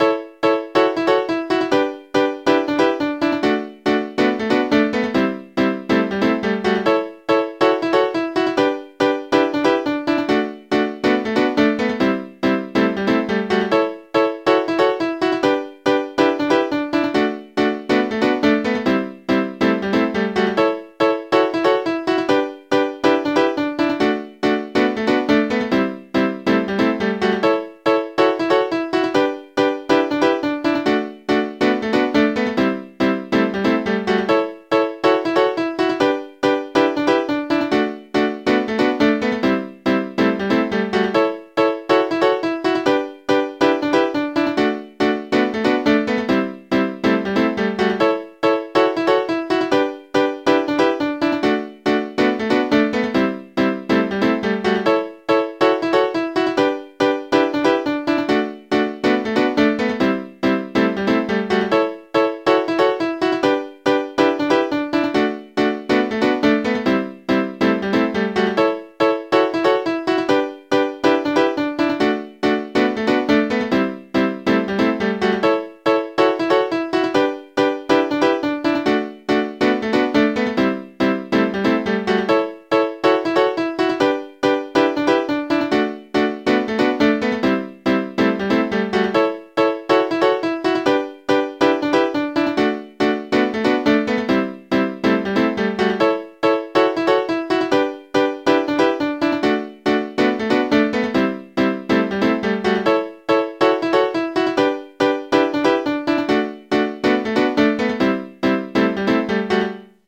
happy chord progression
140bpm, 90s, dance, happy, hardcore, midi, oldschool, oldskool, piano, rave, techno